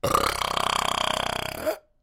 An awkward burp with a hiccup sound at the end.
A studio recording of my friend Cory Cone, the best burper I know. Recorded into Ardour using a Rode NT1 and a Presonus Firepod.
belch, burp